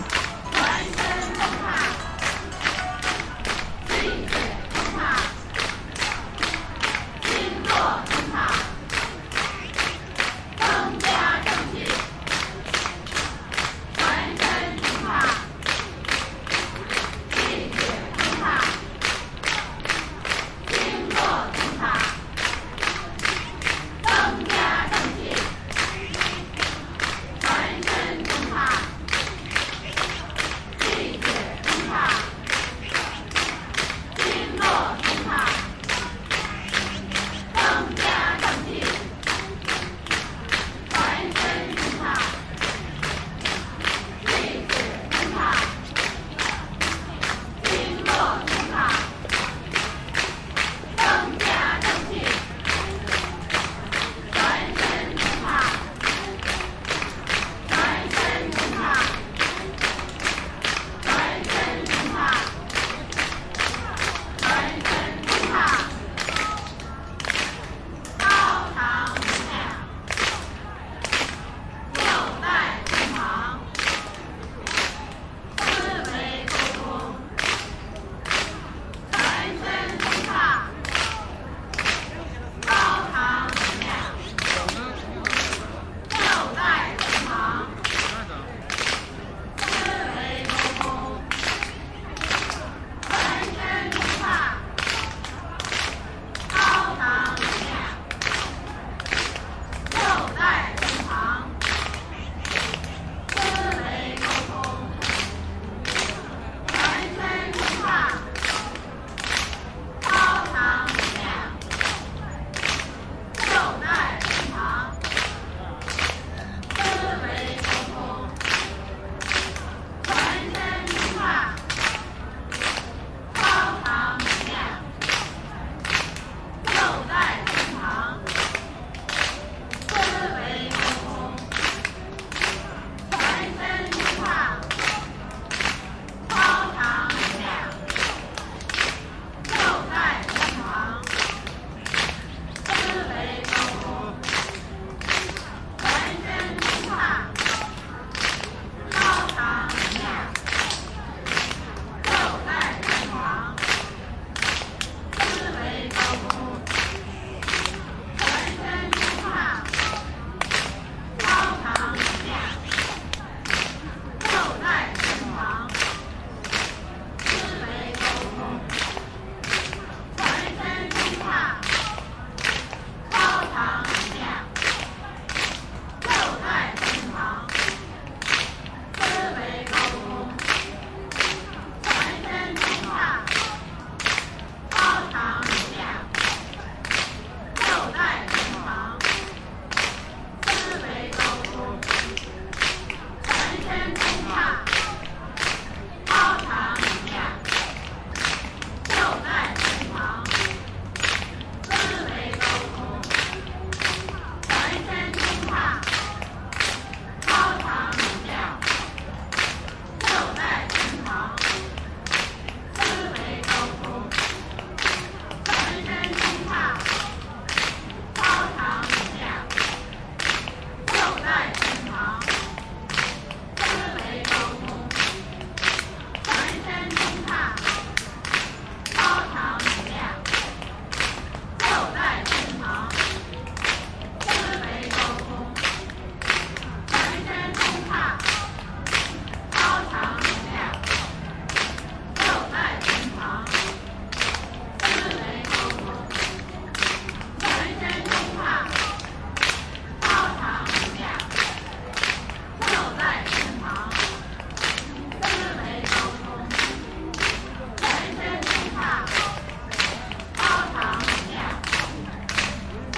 meditation clapping group steady rythm Temple of Heaven Beijing, China
Beijing, China, clapping, group, Heaven, meditation, rythm, steady, Temple